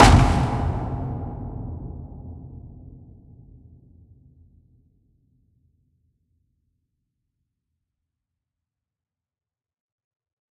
Small slapping sound of a plastic object on a wooden underground with iron properties